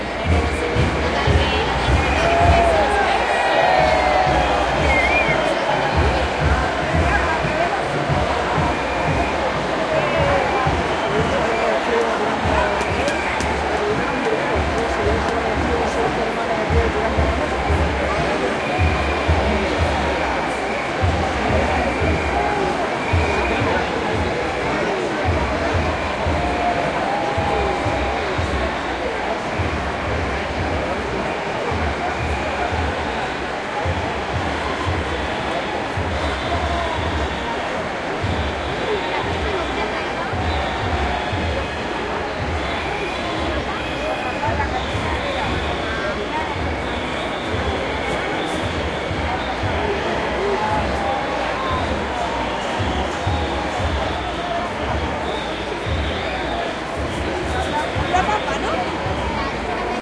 20090105.crowd.drums
large crowd, parade with drums approaching during Christmas celebrations in Seville, Spain. Recorded using two Shure WL183 + Fel preamp + Edirol R09 recorder
city, crowd, field-recording, marching-band, people, seville, voices